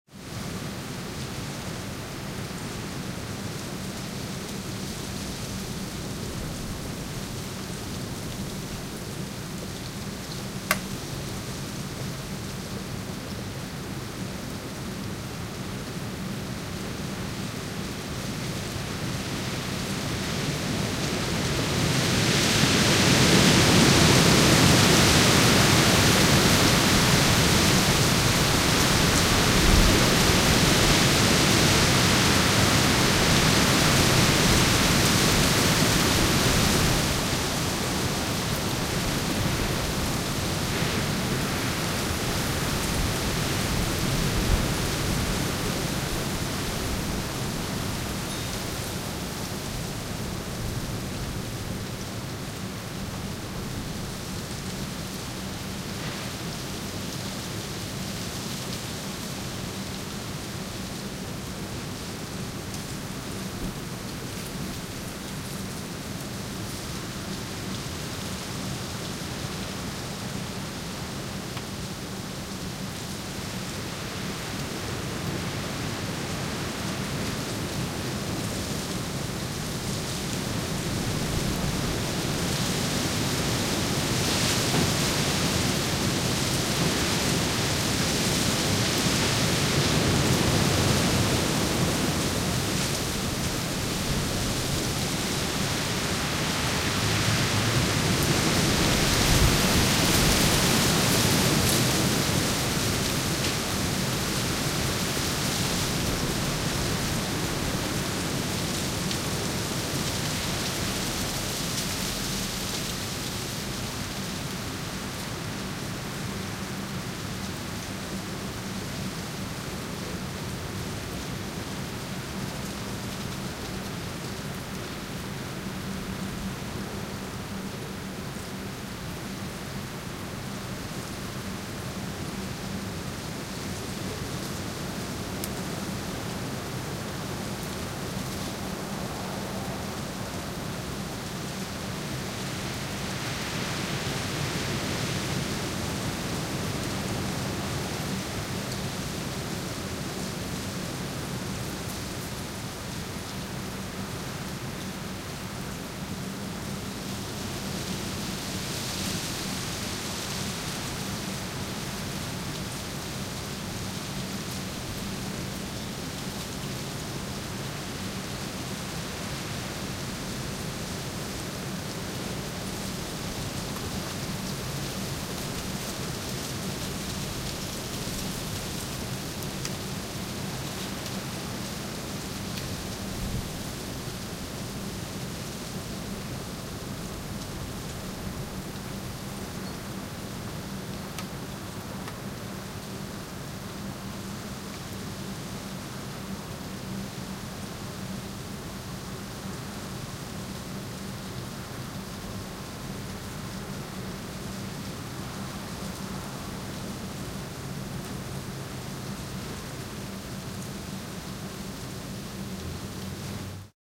Suburb Ambience 01 (Wind)
Suburb environment, wind, noise of the trees and dry branches. The recording was made wintertime. Microphone: 3DIO, recorder: Tascam DR-680.
leaves, rumble, trees